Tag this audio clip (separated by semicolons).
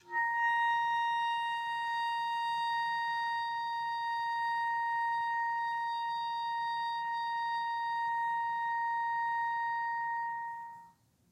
asharp5; clarinet; long-sustain; midi-note-82; midi-velocity-20; multisample; single-note; vsco-2; woodwinds